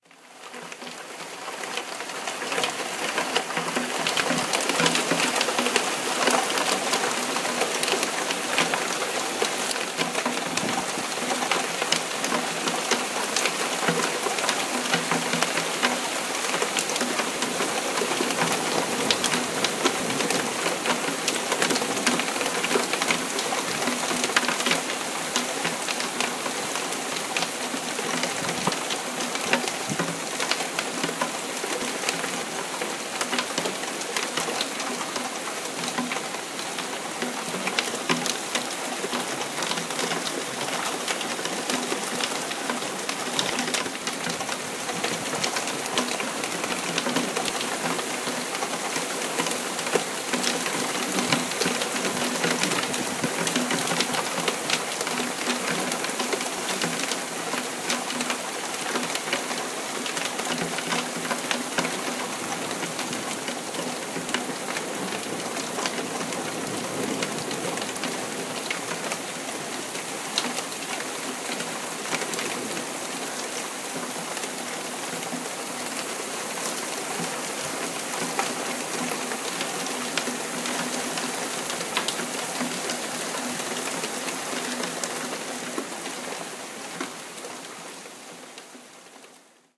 Heavy rain on an open window with decreasing intensity during a late summer thunderstorm. South Yorkshire, England August 2017. Recorded with voice recorder on a Samsung Galaxy S8 smartphone and edited in Adobe Audition.